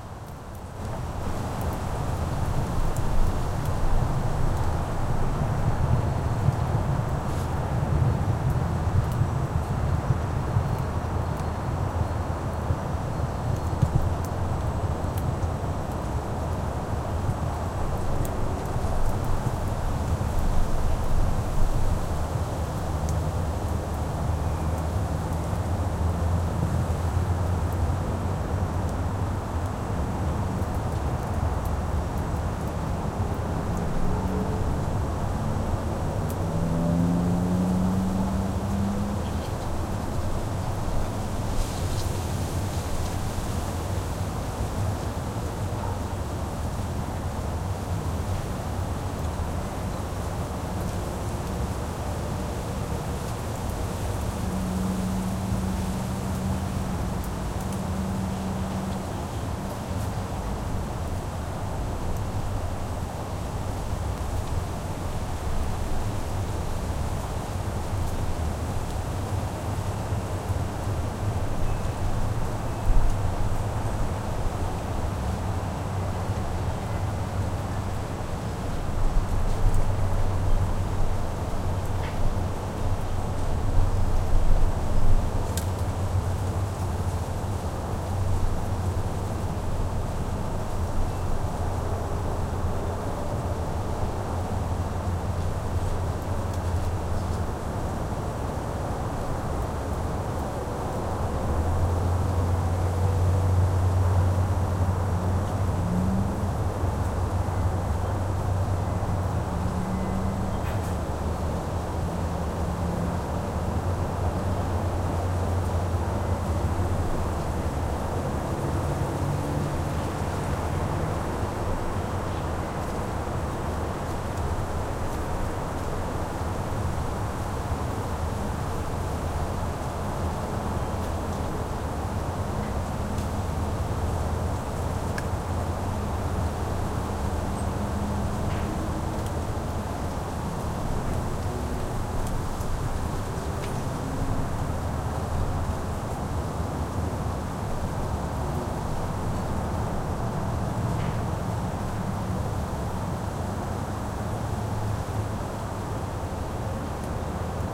Breezy city amb
Breezy and gentle city ambience field-recording made in Austin
Texas with slight traffic and birds. Recorded with a Rode NT4 Mic into a Sound Devices Mixpre preamp into a Sony Hi-Md recorder. Transferred Digitally to Cubase For Editing.
ambience, austin, birds, breeze, city, field-recording, texas, trees